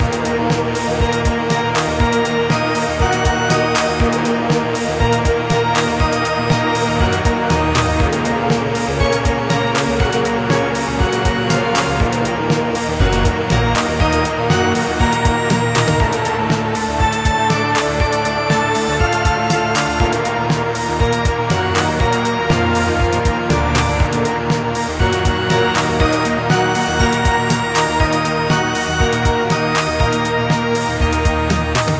Short loops 10 03 2015 3
made in ableton live 9 lite
- vst plugins : OddlyOrgan, Balthor,Sonatina choir 1&2,Strings,Osiris6,Korg poly800/7 - All free VST Instruments from vstplanet !
- midi instrument ; novation launchkey 49 midi keyboard
you may also alter/reverse/adjust whatever in any editor
gameloop game music loop games organ sound melody tune synth piano
game, gameloop, games, loop, melody, music, organ, piano, sound, synth, tune